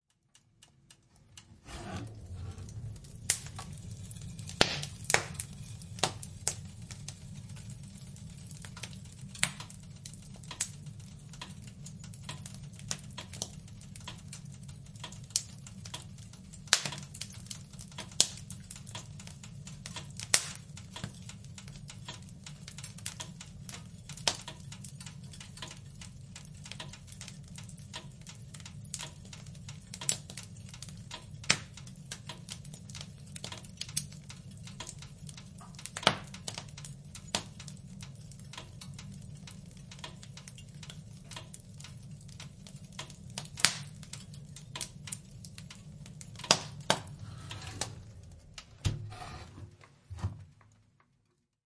boiling insert backside
Opening the back door of a boiling insert. Then closing the door.
Pop of the wood fire, metal crack. Close up.
France, 2021
Recorded with ab set up of EM127
recorded with Sounddevice mixpré6
burn, burning, crack, crackling, fire, fireplace, flames, heat, pop, wood